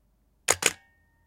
Nikon D800 Shutter 1 10 Sec noLens
The Sound of the Nikon D800 Shutter.
Without Lens.
Shutterspeed: 1 / 10
Lens, Nikon, Mirror, Sound, Shutter, Camera, Speed, Kamera, D800